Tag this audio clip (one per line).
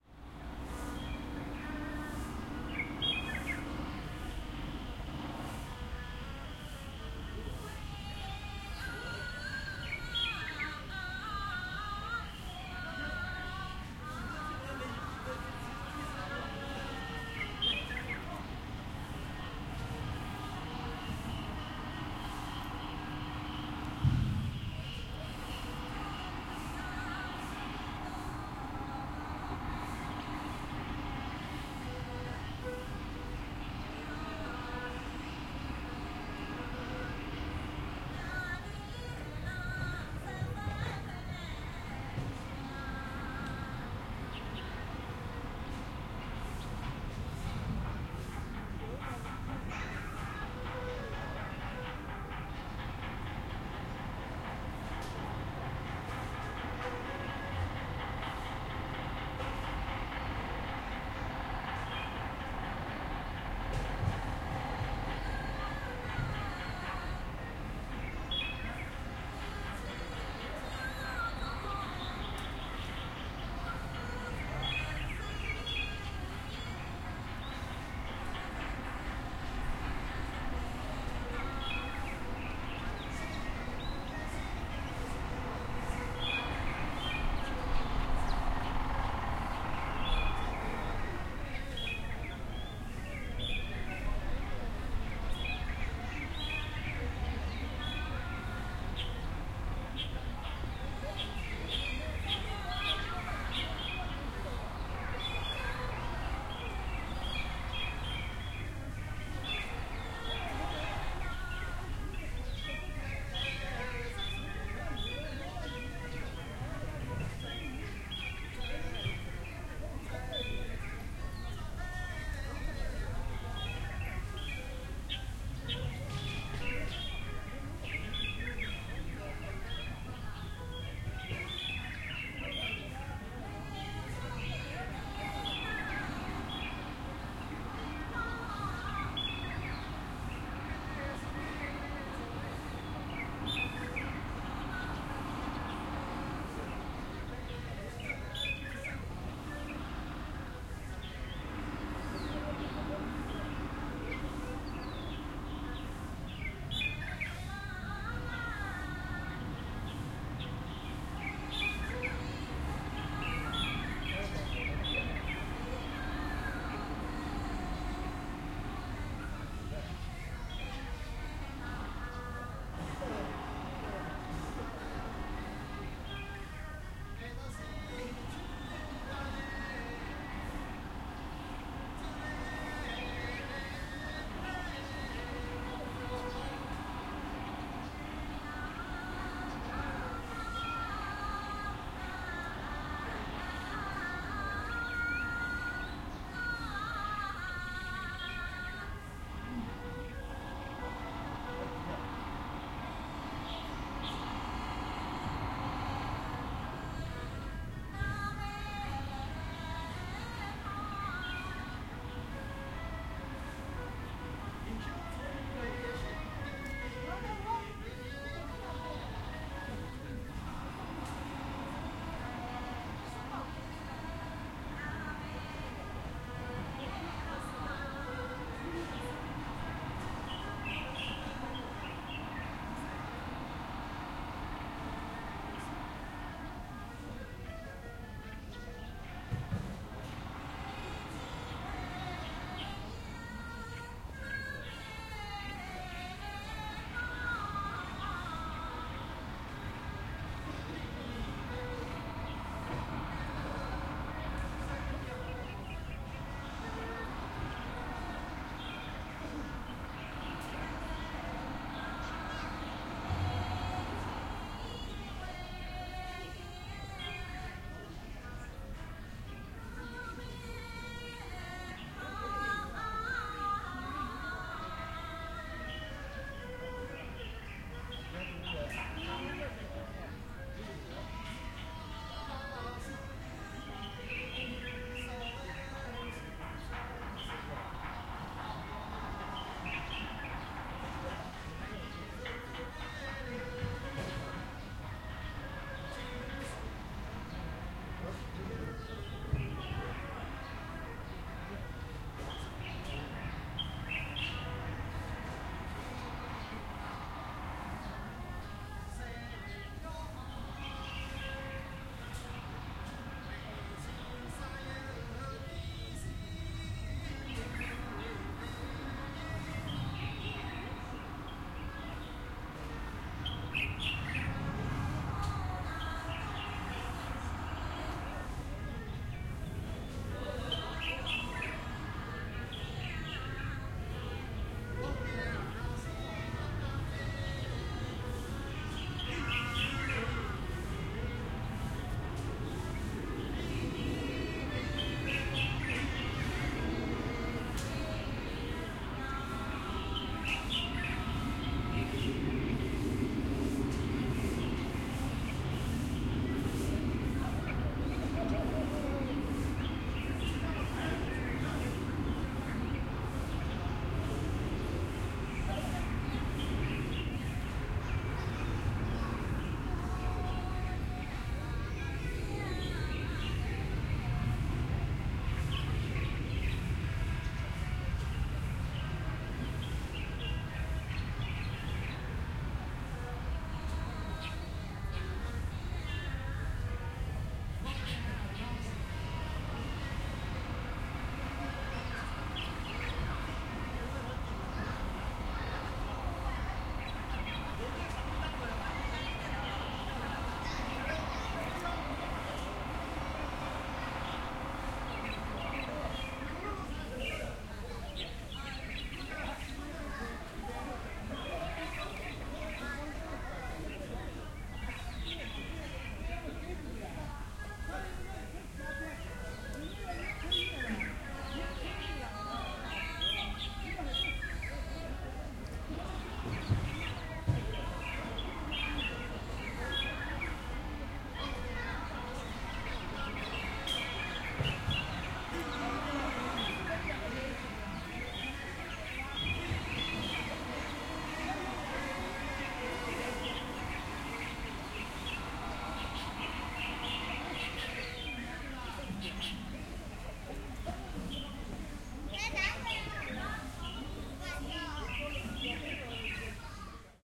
flute
park
singing
Nanhui
voices
Asian
music
Chinese
China
ambience
field-recording
Shanghai
construction
suburb